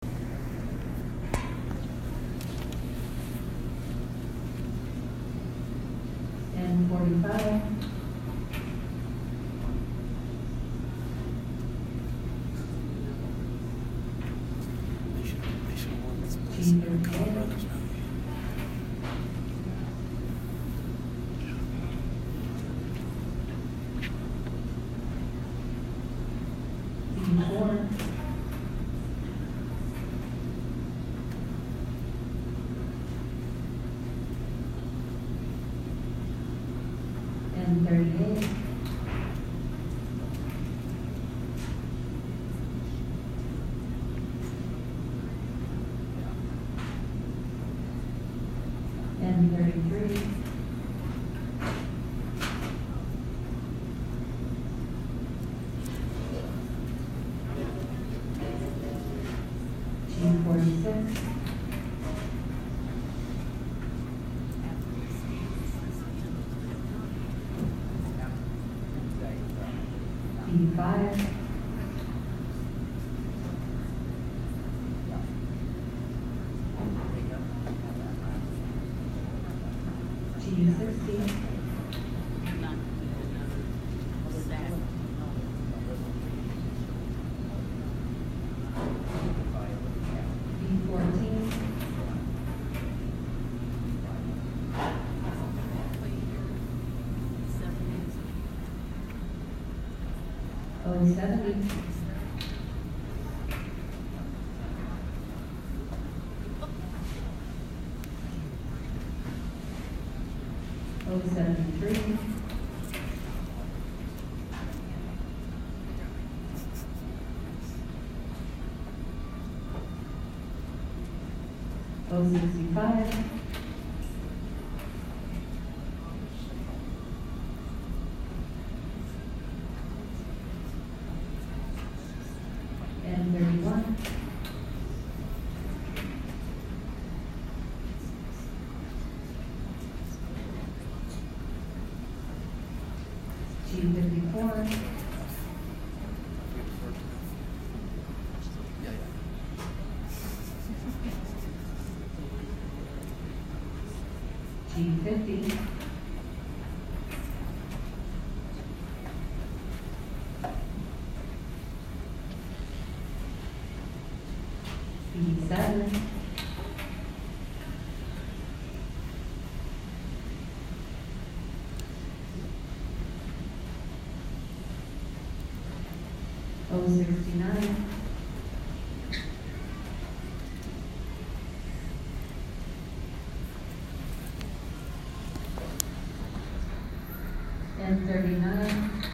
Ambient sounds of the subdued late night bingo at Big Top Bingo in Fargo, ND
I was not prepared with any official gear for this but I loved the soothing sounds of this bingo hall so I took this audio with the voice memo app on my iPhone 6
ambiance, ambient, bingo